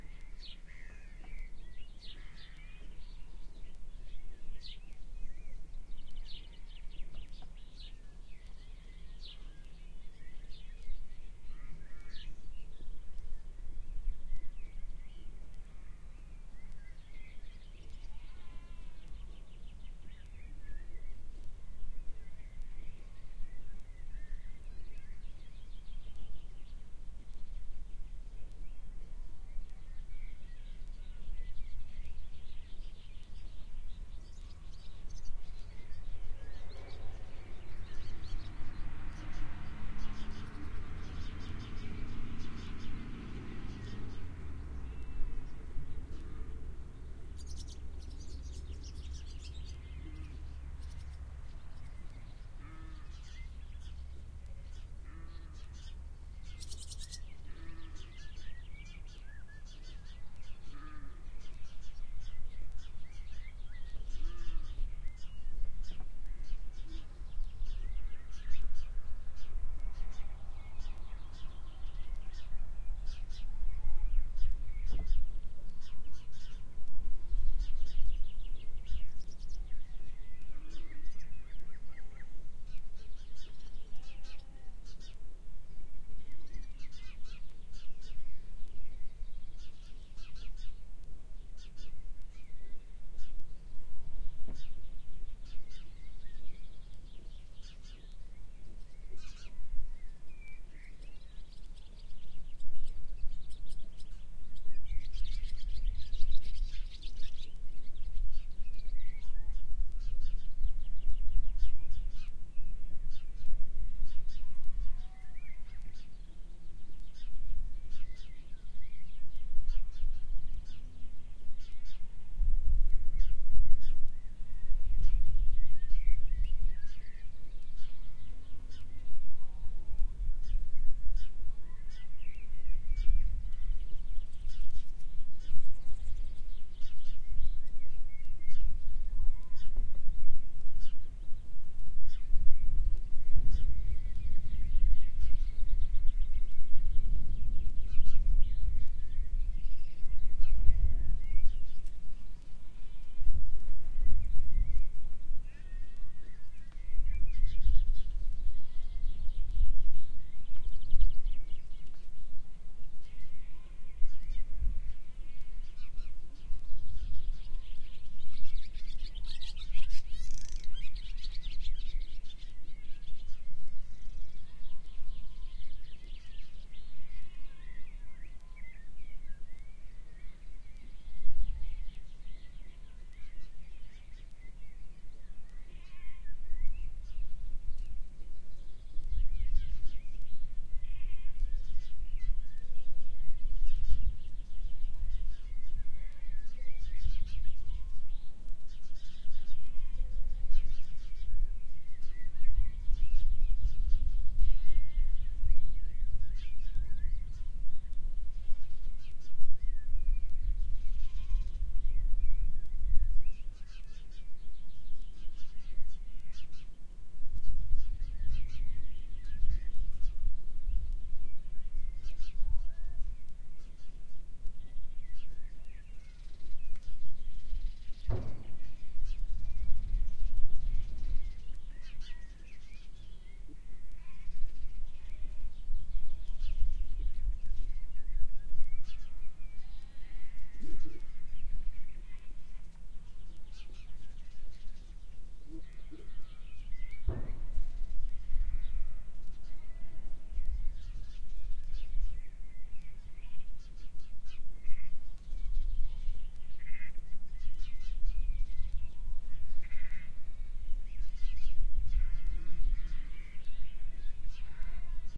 at the farm
Recorded in June 2011 using two WL183 microphones into a Sony PCM-D50 recorder, near a field in Perthshire, on which sheep and cows were grazing. Great recording, if there wouldn´t be a strange ticking on it. No clue, what caused it? Maybe a power line nearby?
seagulls, farm, cows, sheep, swallows, field-recording, crows, scotland